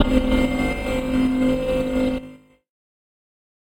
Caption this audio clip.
Broken Transmission Pads: C2 note, random gabbled modulated sounds using Absynth 5. Sampled into Ableton with a bit of effects, compression using PSP Compressor2 and PSP Warmer. Vocals sounds to try to make it sound like a garbled transmission or something alien. Crazy sounds is what I do.
ambient, artificial, atmosphere, cinematic, dark, drone, electronic, evolving, experimental, glitch, granular, horror, industrial, loop, pack, pads, samples, soundscape, space, synth, texture, vocal